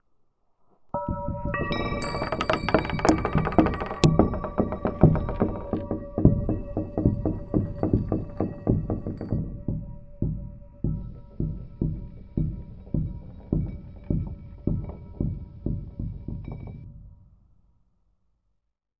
Wind chimes, creaking wood.